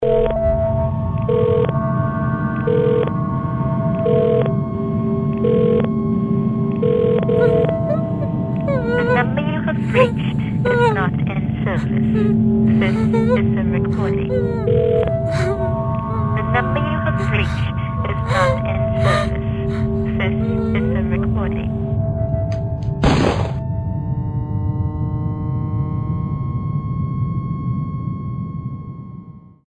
in one moment
a atmospheric sample that represent a women in a desperate state
atmospheric, drone, female, film, horror, voice